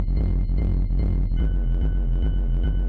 Making weird sounds on a modular synthesizer.
synth-library, synth, weird, modular, synthesizer, noise, analog